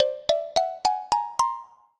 Xylophone for cartoon (8)
Edited in Wavelab.
Editado en Wavelab.
animados, cartoon, comic, dibujos, xilofono, xylophone